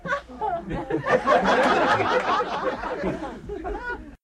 señal original
laught, tool, work